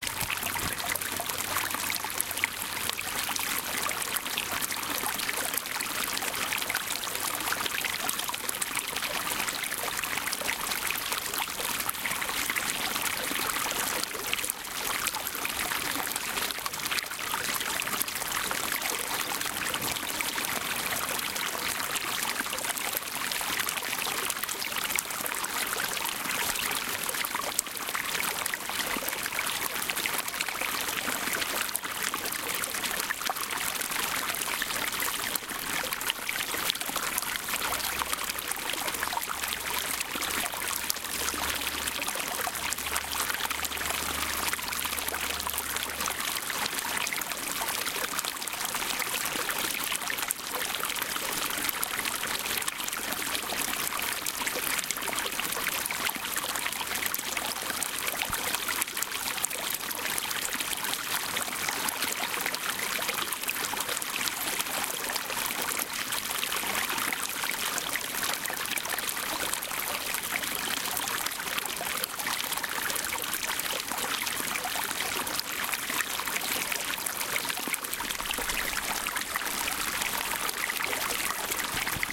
Recorded on a sunny day in southern Arizona hillside near Box Creek Cayon using a ZOOM 2
Water, rocks, creek, over, Running, Splash, babbling, Stream, brook